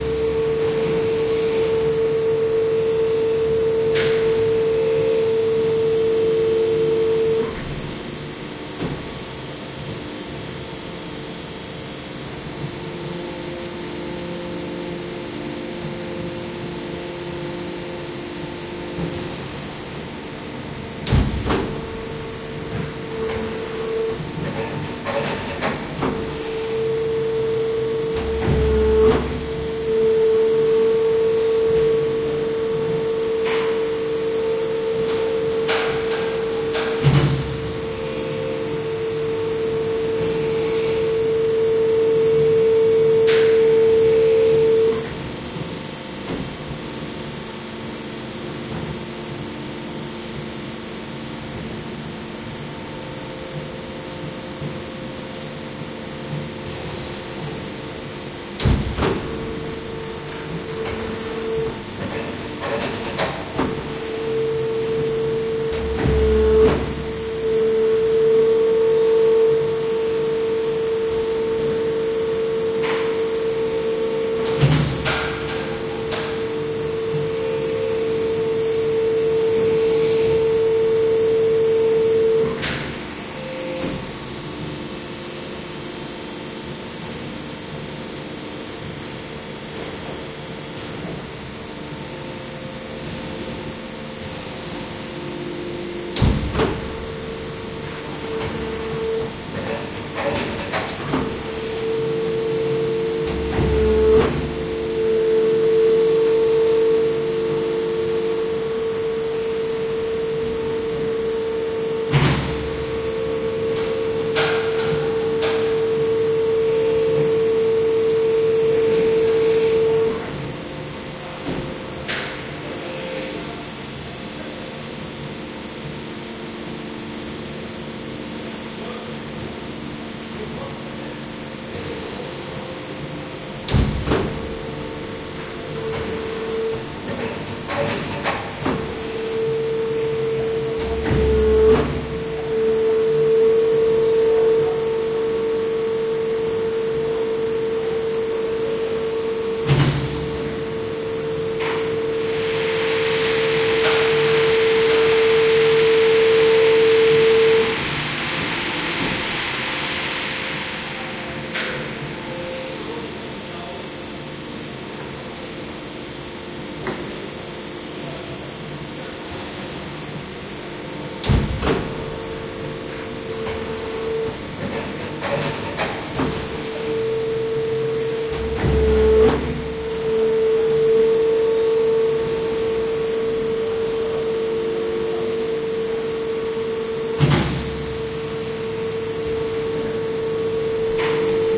the sound of working injection molding machine - rear
sound working injection molding machine
injection, working, machine, molding, sound